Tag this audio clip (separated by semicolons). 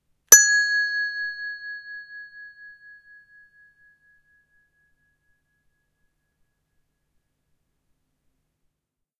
Ab; A-flat; bell; bells; bright; G; G-sharp; hand; instrument; percussion; single